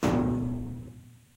A pane of glass struck with the tips of the fingers.